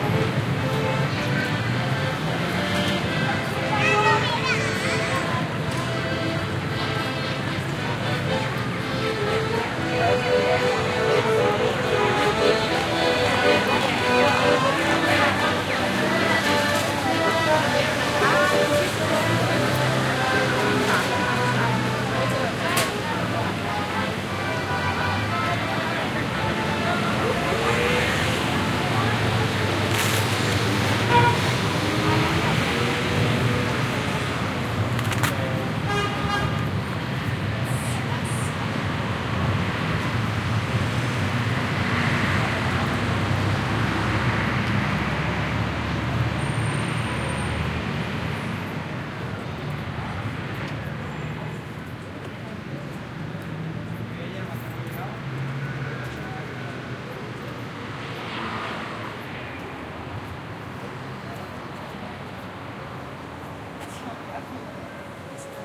20060922.puerta.triana
street noise as I passed a lively terrace at Puerta de Triana, Seville (around 8 PM). Includes an accordion, voices, traffic, etcetera / grabado en Puerta Triana, Sevilla
accordion, ambiance, city, field-recording, musical-instruments, streetnoise, summer